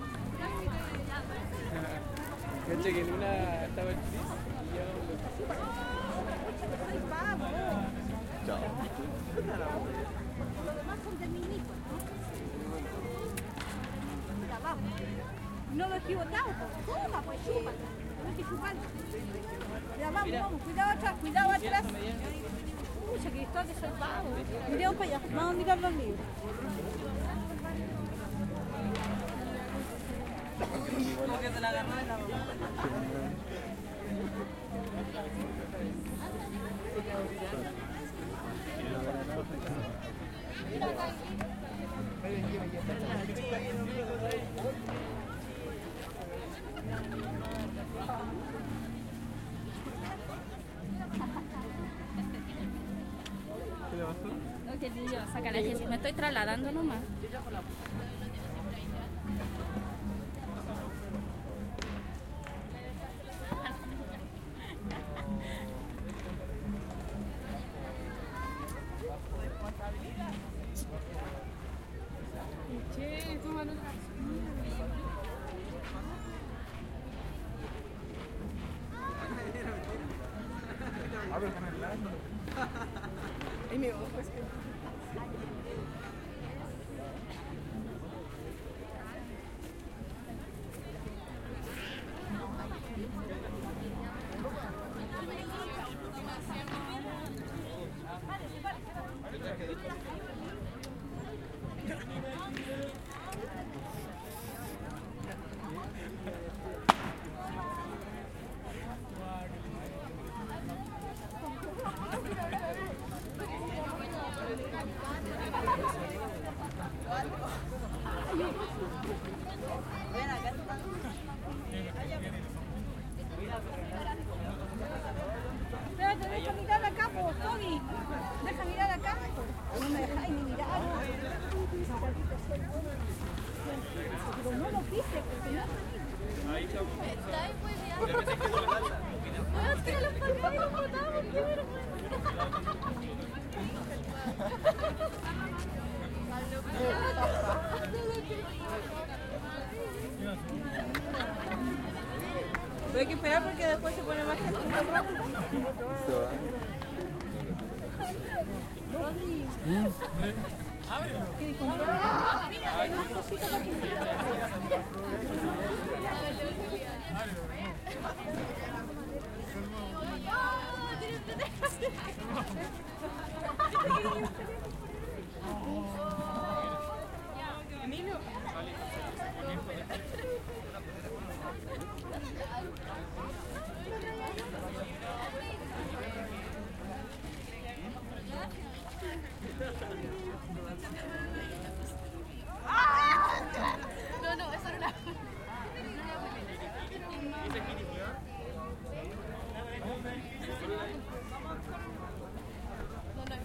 gratiferia quinta normal sab 23 jul 06
cl, trade, gratiferia, people, market, outdoor, normal, festival, field, fair, park, quinta